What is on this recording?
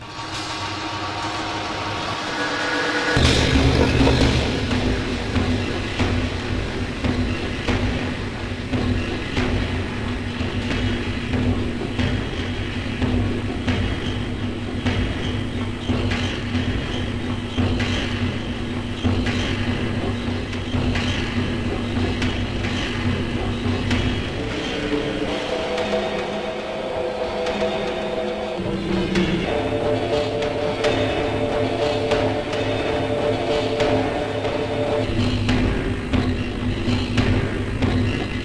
dub drums 022 dubjazz
drums, dub, experimental, reaktor, sounddesign